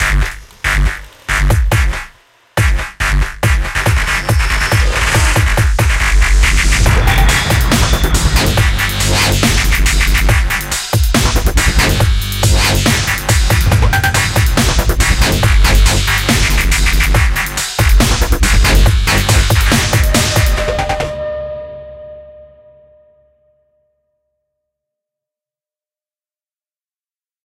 Dubstep Logo 2
A 30 second dubstep/robostep hard hitting ident
Composed in Ableton
-Julo-
intro, outro, heavy, dubstep, music, ident, logo, robostep, short